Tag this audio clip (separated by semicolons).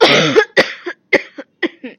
cough; coughing; voice; female